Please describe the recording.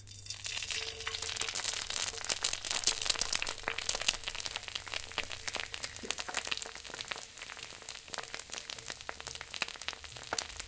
Frying an egg. Recorded using a Rode NT4 into a Sony PCM D50.
cooking, foley, food, frying, sizzling